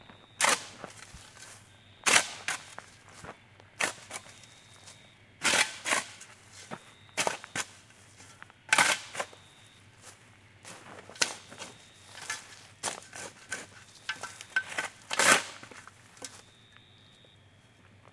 Shovelling gravel and dirt at road cutting, Leith, Tasmania. Recorded on a Marantz PMD 661 with a Rode NT4 at 11:30 pm, 15 Feb 2021.